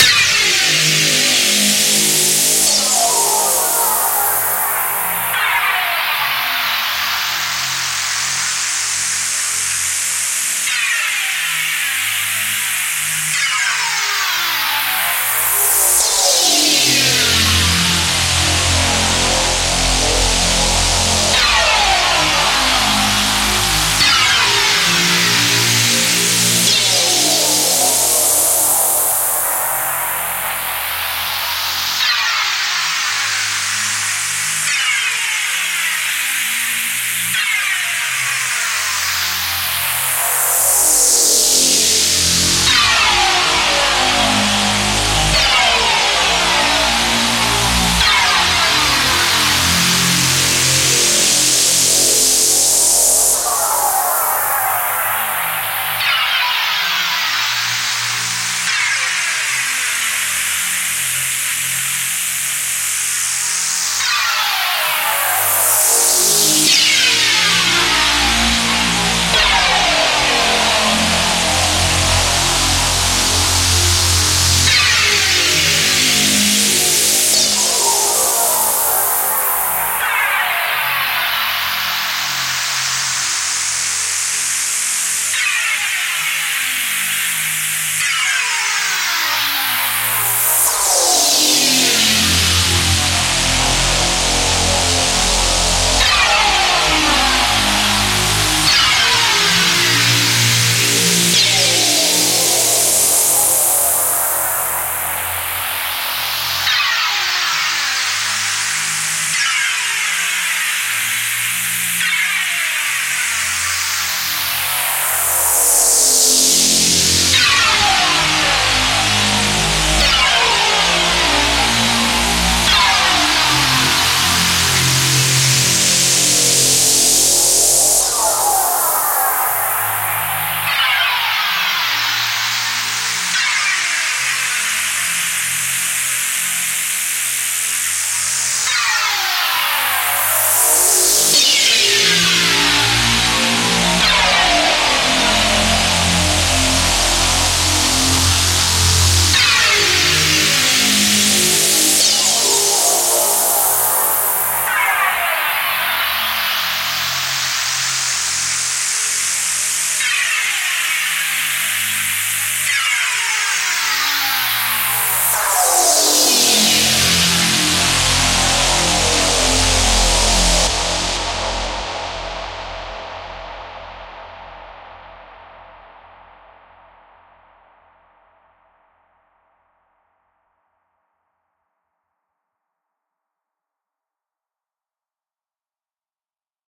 PGG Sync made in Serum